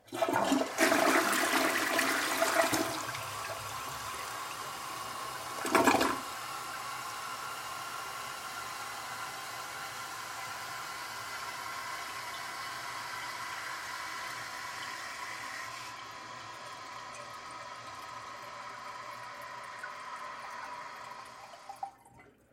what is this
Flushing toliet
intermediate, sound